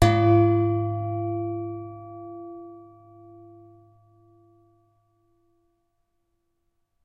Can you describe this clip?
Blancos Hotel tea-tray
I knocked this tray which was on a table in my hotel room by accident with my wedding ring, while it still had cups on it. It was such a good sound I had to do it again, this time, taking everything off of the tray, silencing my laptop, phone and the TV, and recording from close and far range with my Zoom H1. This is one of a great many dings I recorded, and I consider it one of the better ones.
Chime, zoom, Talbot, Wales, Ring, Ding, United, Digital, Hotel, Teatray, Blancos, Port, Recorder, H1, Kingdom